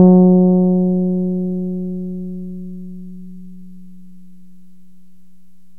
These are all sounds from an electric six string contrabass tuned in fourths from the low A on the piano up, with strings A D G C F Bb recorded using Cool Edit Pro. The lowest string plays the first eight notes, then there are five on each subsequent string until we get to the Bb string, which plays all the rest. I will probably do a set with vibrato and a growlier tone, and maybe a set using all notes on all strings. There is a picture of the bass used in the pack at